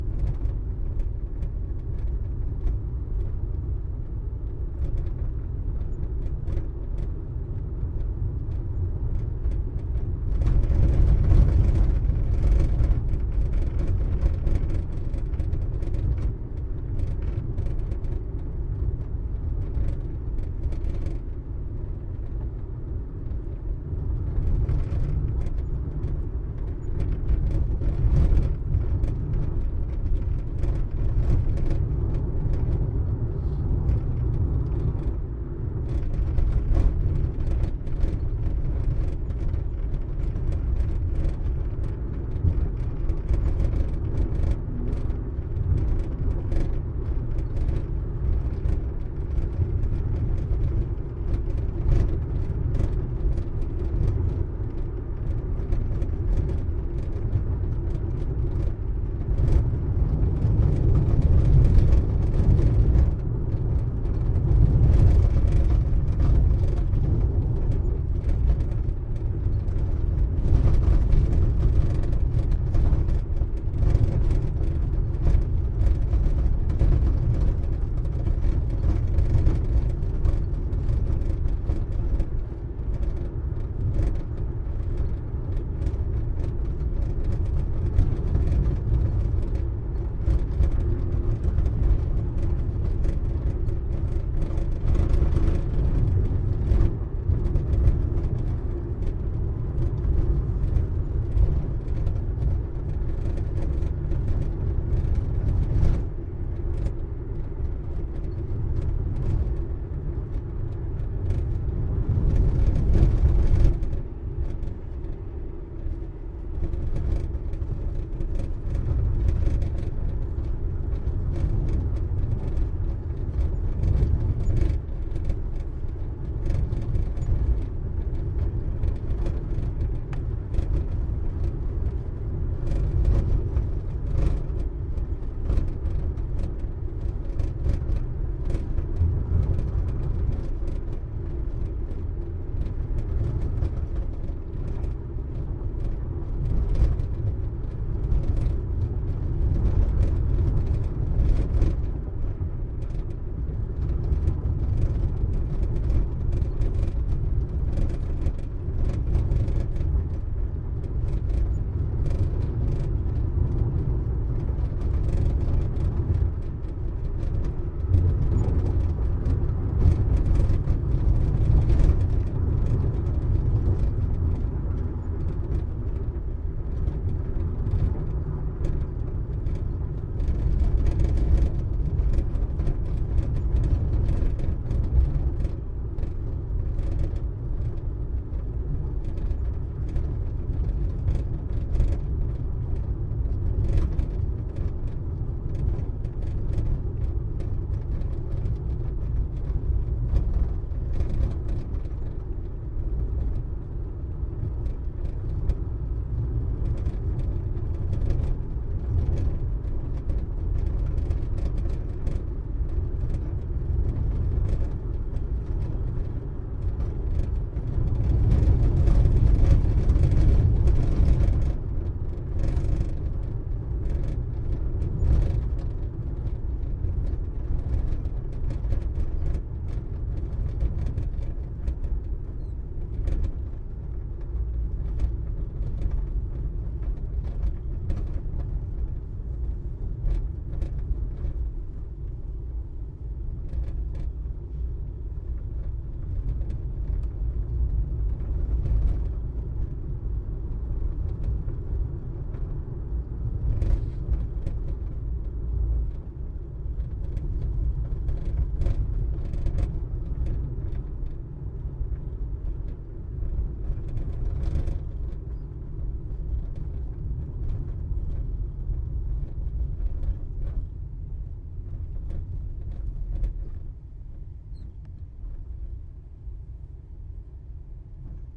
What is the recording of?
auto int real rattly driving bumpy road medium speed 40kmph front
40kmph, auto, bumpy, car, driving, int, medium, rattly, real, road, speed